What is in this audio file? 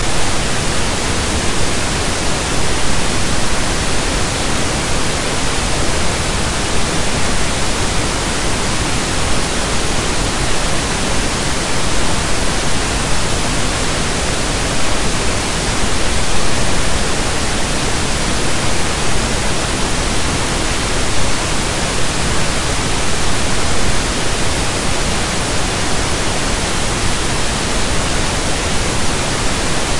this one is pink noise as we all like it...